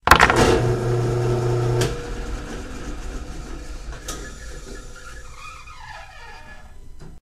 mono field recording made using a homemade mic
in a machine shop, sounds like filename--drill press on and off--nice drone
percussion, metallic